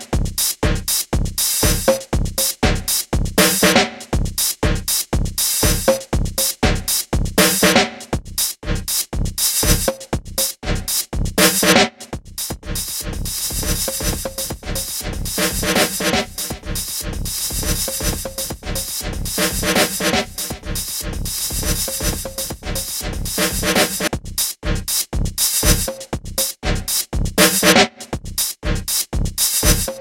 Gated Drums 008 - gate before echo
gated-drums, processed, drums, delay, gate, vst, gated, echo, effect